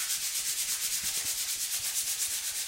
sandpaper wooden surface
sandpaper, wood